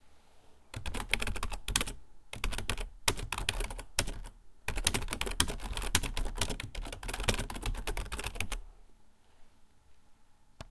My typing on a keyboard. The TASCAM DR-05 is lying on the table in front of the SPACE BAR.